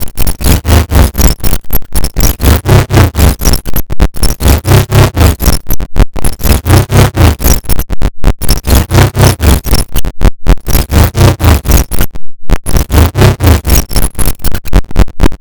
There's been a breach in the hackframe. Prepare to launch diagnostic security mi55iles.
abstract, crunchy, digital, fold, electric, sound-design, sfx, breach, glitch, hack, sounddesign, robobrain, glitchmachine, strange, buzz, noise, machine, generate, droid, interface, telemetry, sound-effect, electronic, future, click, soundeffect, distorted, sci-fi, robotic, diagnostics